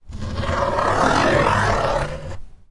Box 25x25x7 thin ROAR 007
The box was about 35cm x 25cm x 7cm and made of thin corrugated cardboard.
These sounds were made by scrapping the the box with my nail.
They sound to me like a roar.
cardboard, scratch, dare-9, roar